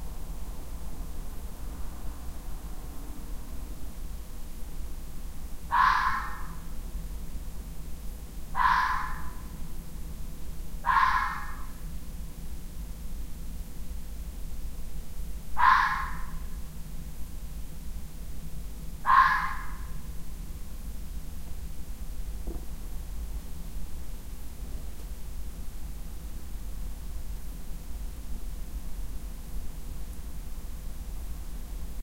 Fox barking in the distance (perhaps 100m away), around 1 AM in the Surrey Hills (UK).